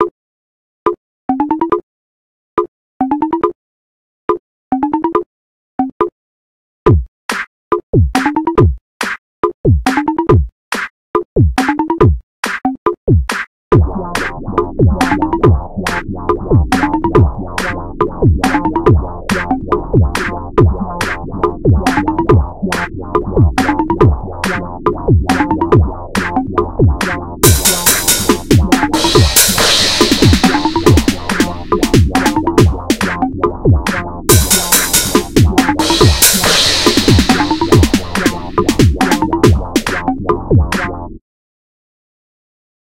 Bongo drum beat loop
claps, loop